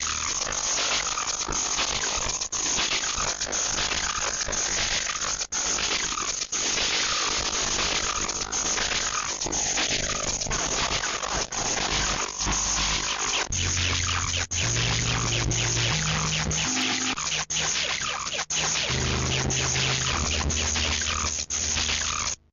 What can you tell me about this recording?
circuit bent keyboard
bent, circuit